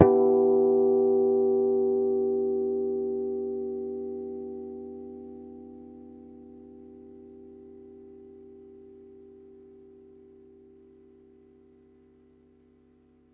Finger plugged.
Gear used:
Washburn WR-150 Scalloped EMG-89 Bridge
harmonics, scalloped, wr150